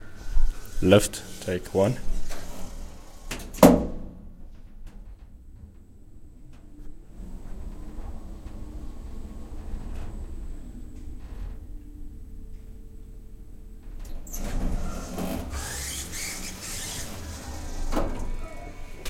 The sound of an elevator closing and opening
door, open, OWI, opening, Elevator, Metal, closing